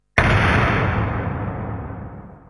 industrial blast06
industrial, blast